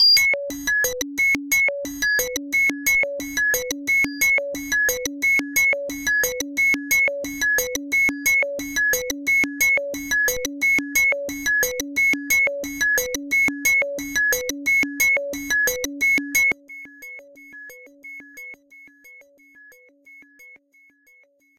ambienta-soundtrack crystal KiQLess-089bpm
outtake from "Ambienta" soundtrack. 3 voices out of crystal vst